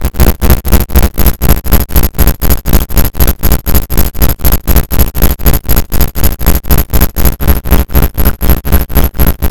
There's been a breach in the hackframe. Prepare to launch diagnostic security mi55iles.
electronic, noise, sounddesign, robobrain, interface, robotic, click, generate, soundeffect, sci-fi, distorted, strange, crunchy, droid, diagnostics, electric, hack, digital, fold, machine, sound-effect, future, glitchmachine, sfx, sound-design, glitch, buzz, abstract, breach, telemetry